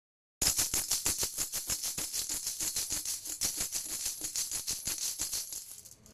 Sound of a pigg bank with coins